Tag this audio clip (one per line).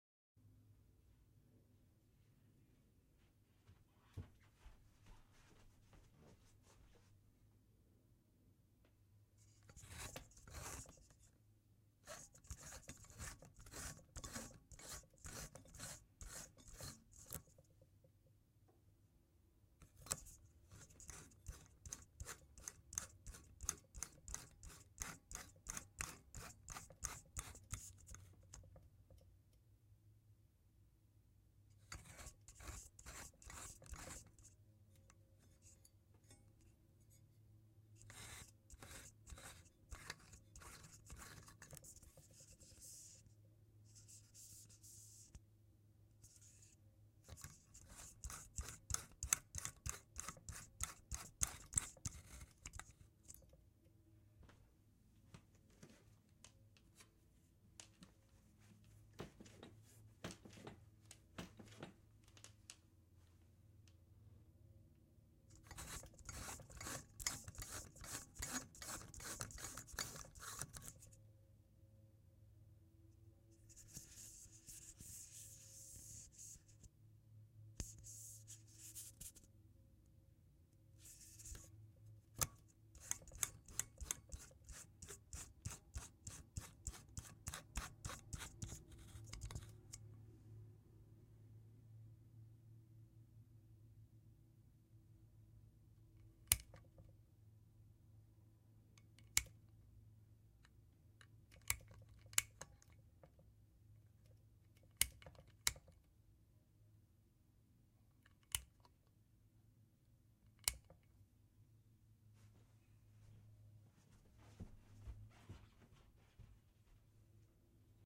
light
fx
off
screw
switch
bulb